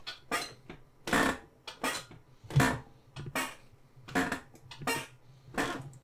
squeaky desk chair
Desk chair creaking and squeaking
squeaky, desk, squeak, creak, chair, creaky